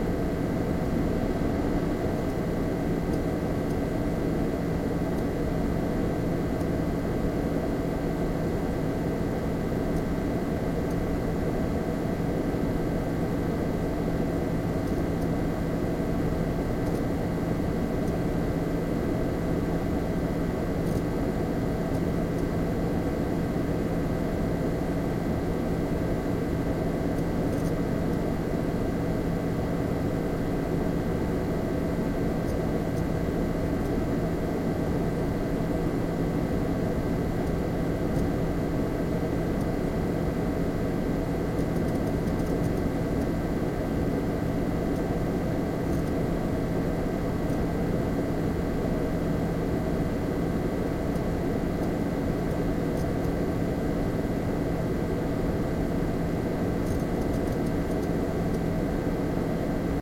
Computer Hum Noise
Desktop computer making wind.